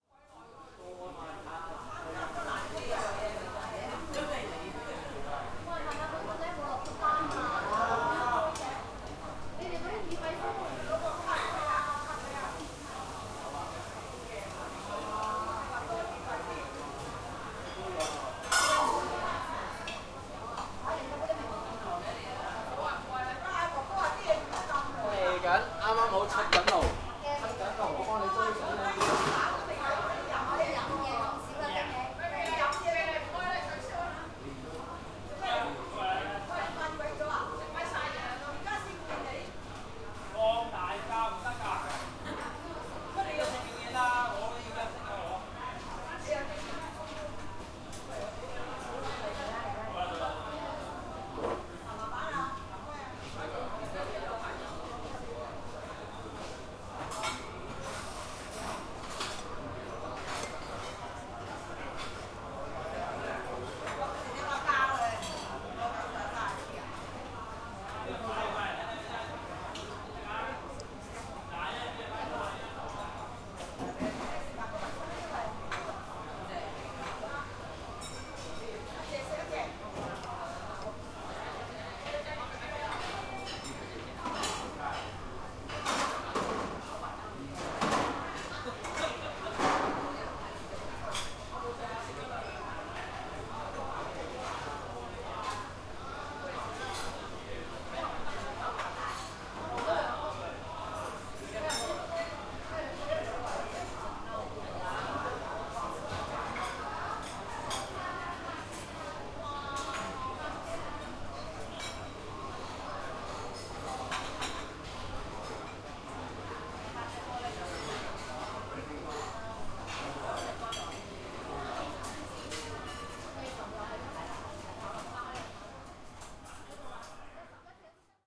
Restaurant amb1
Stereo recording of restaurant ambiance in Hong Kong. The restaurant was busy. The workers were packing the used chopsticks, spoons and bowls into a big plastic bucket. Hong Kong people love to stay and chat in restaurants after meals. Recorded on an iPod Touch 2nd generation using Retro Recorder with Alesis ProTrack.